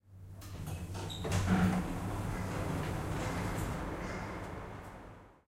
elevator door open 7a
The sound of a typical elevator door opening. Recorded at the Queensland Conservatorium with the Zoom H6 XY module.